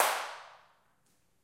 Snaps and claps recorded with a handheld recorder at the top of the stairs in a lively sounding house.